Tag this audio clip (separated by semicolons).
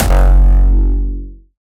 access; analog; by; c; decent; edm; hardcore; harder; hardstyle; hardware; kick; lulz; me; meh; style; synth; virus